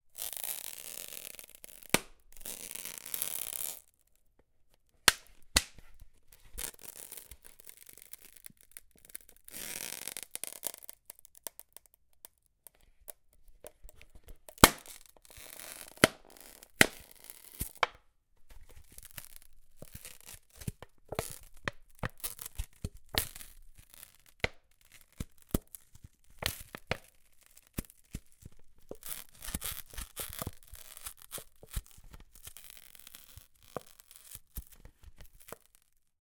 Soda bottle sticker
Miked at 2-4" distance.
Plastic pop bottle squeezed, causing label to peel off bottle, and creating popping kinks in bottle.
plastic, bottle, resonant, pop, sticker, peel